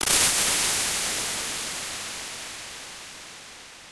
Artifact impulse responses created with Voxengo Impulse Modeler. Its shaped like a diamond that thinks its a rectangle.
convolution, impulse, ir, response, reverb